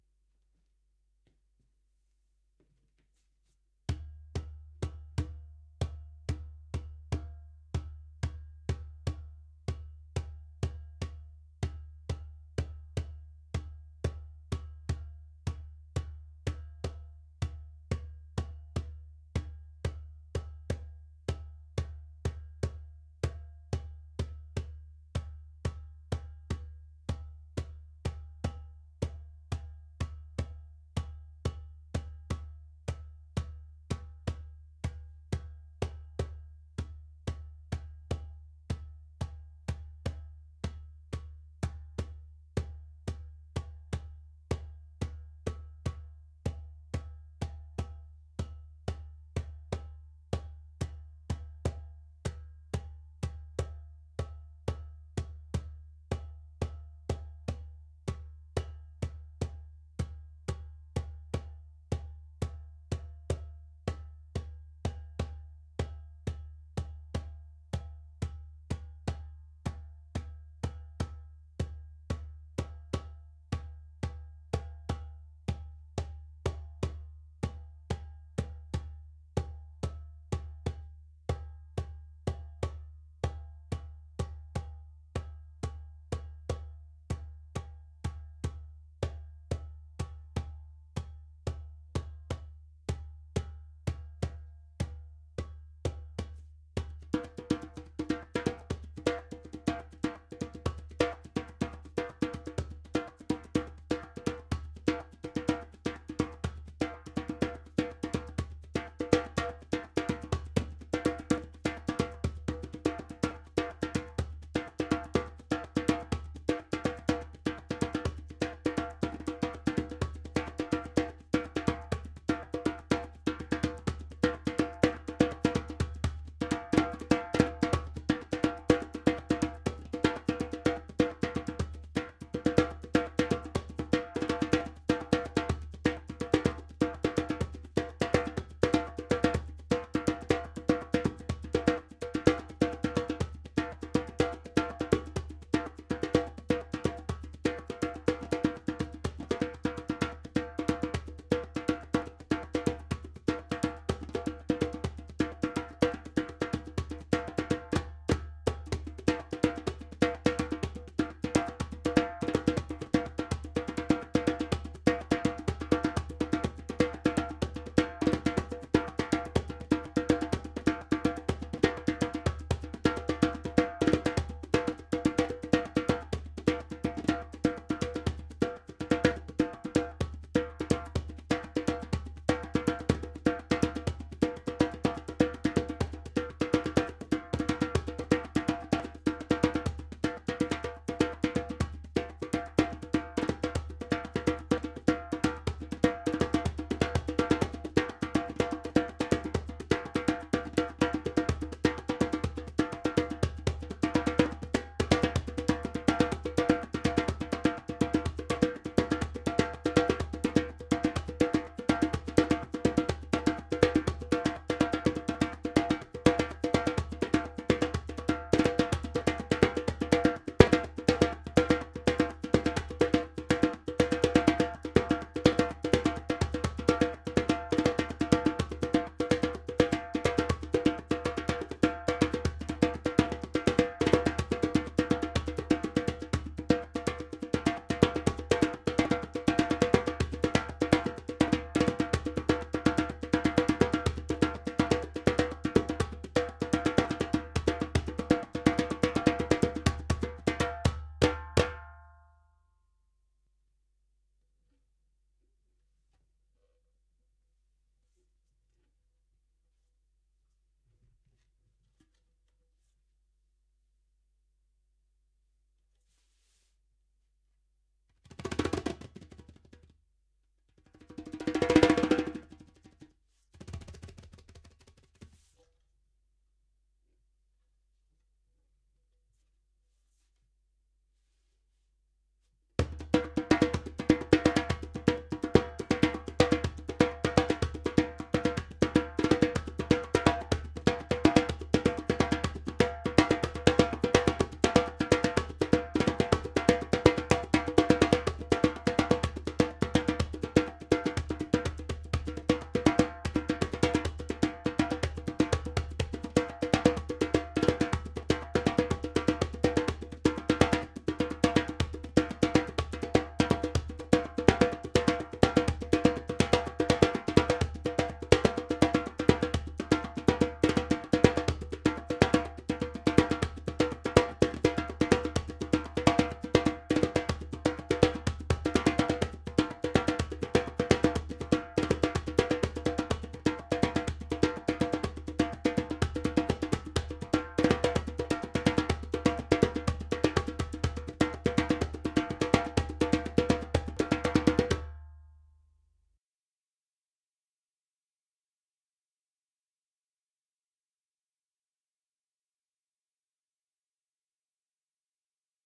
This is part of a set of drums and percussion recordings and loops.
Djembe 1 whole song recording - not entirely tight.
I felt like making my own recording of the drums on the song Jerusalema by Master KG.